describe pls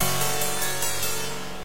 147 IDK melody 01
melody original nomidi
melody, nomidi